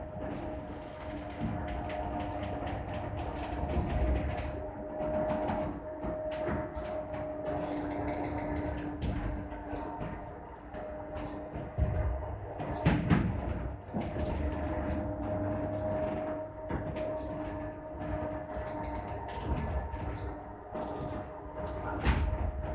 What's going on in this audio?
Captured by recording a electrical garage door. A low droning noise like a machine in operation. Can be used in a factory or industrial environment.
Factory, Machinery